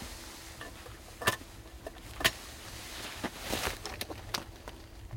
Loading side by side 3
Sounds taken from a shooter loading his side-by-side before the shoot begins.
cartridge, cartridges, clothing, gun, gun-sleeve, loading, over-and-under, pheasants, rustling, season, shells, shooting, shot, shotgun, side-by-side, sleeve